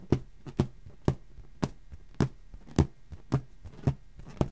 Thumping on a balloon in front of a cheap Radio Shack clipon condenser.